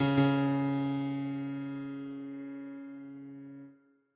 piano-hit-var1
I like this piano hit and i made 2 of these but in different variations. Thank You!
2Notes, Hit, Piano, PianoHit